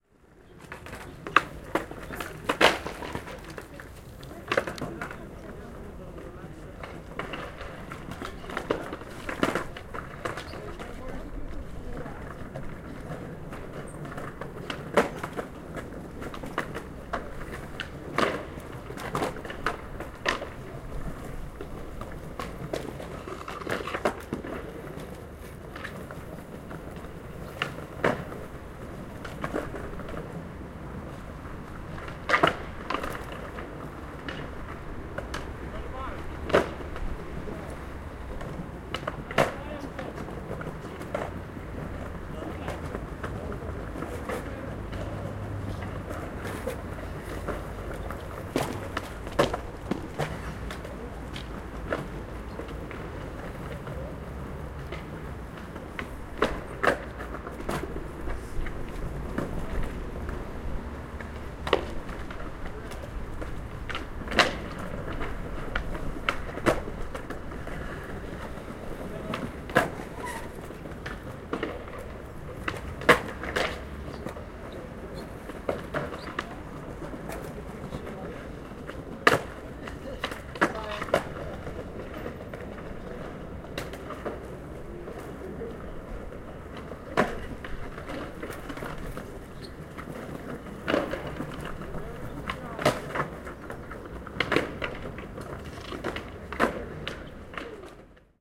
12.02.2011: about 14.00. Kutrzeby street park with a Poznan Army Monument. Poznan in Poland. sound event: a group of skates is riding skateboards.
click, field-recording, poland, poznan, skates, street